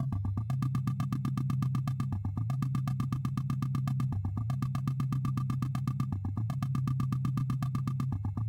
Muster Loop 3
120bpm. Created with Reason 7